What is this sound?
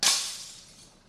Glass smashed by dropping ~1m. As recorded.